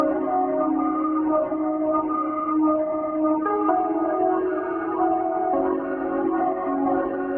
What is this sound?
130BPM Ebm 16 beats